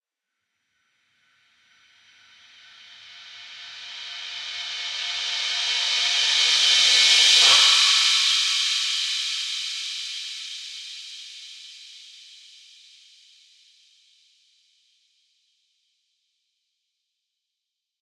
Rev Cymb 7 reverb
Reverse Cymbal
Digital Zero
metal, fx, cymbal, reverse